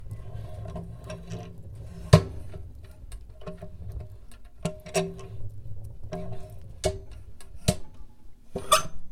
wood stove handle turning 01
In this clip, I mess around with a woodstove's handle. It's nice and squeaky :) You can hear woodstove heating up in the background.